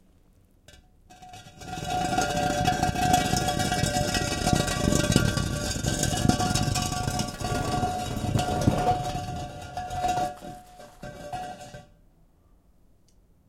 Dragging different kinds of stones, concrete blocks, on a concrete ground, looking for the sound of an opening grave, for a creepy show. Recorded with a zoom H2.